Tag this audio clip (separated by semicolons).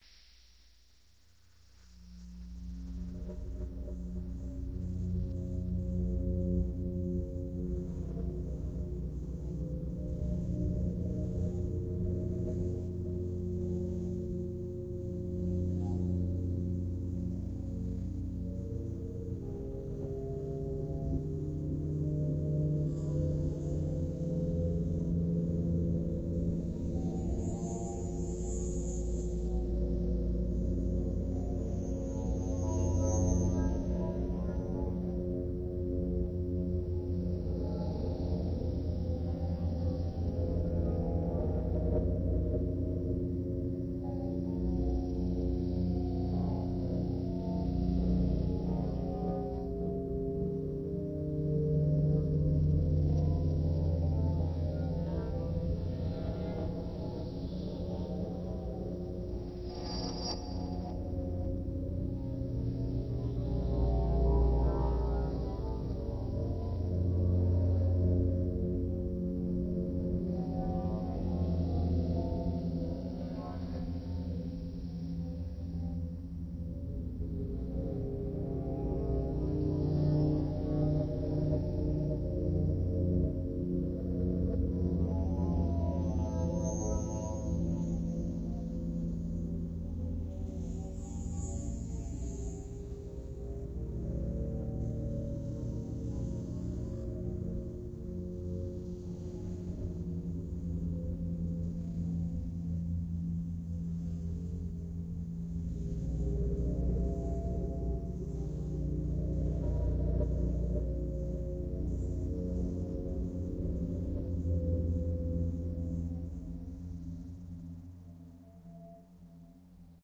ambiance; ambient; atmosphere; background-sound; general-noise; soundscape